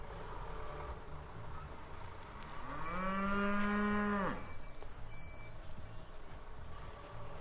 Cow moo #4
A short distance moo.
cattle, countryside, cow, cows, farm, farm-animals, lowing, moo, mooing